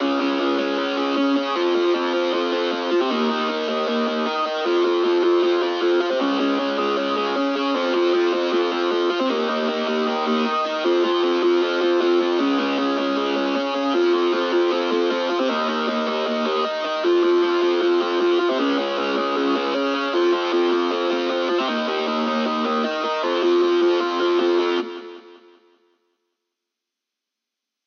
Distorted Synth (155 BPM/ Tempo)
This is a distorted Synth sound created using Massive and third party effects and processes.
155-BPM, Synth, electric-dance-music, music, EDM, electric, Distorted, dance, Lead